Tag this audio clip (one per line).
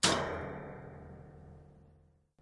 Industrial
Machinery
Metal
MetalHit
Steampunk
Sword
Weapon